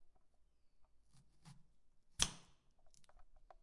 013 House PotatoCutting
cutting a potato sound
cooking, kitchen, house